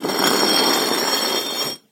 Brick-Drag-Concrete-01
This is the sound of a brick being dragged across a concrete floor. Some suggestions for alternate uses could be a for a large stone door or other such thing.
pull, Rock, Concrete, Brick, Pulled, Drag, Dragged, Stone